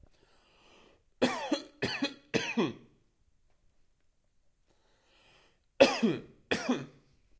Man coughs. Recorded from Zoom H2. Audacity: normalize and fade-in/fade-out applied.
man, allergy, cough, UPF-CS12, sick, cold